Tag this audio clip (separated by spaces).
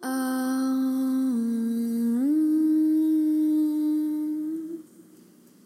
vocal elements female voice